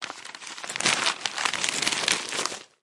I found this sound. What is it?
HOUSE PAPER Newspaper Open 01
Paper accent - newspaper opening 1.
movement, open, paper